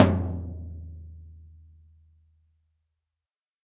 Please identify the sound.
Toms and kicks recorded in stereo from a variety of kits.
acoustic, drums, stereo